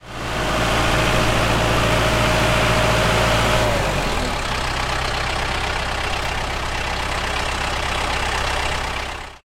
Tractor Drive 06
field-recording, driving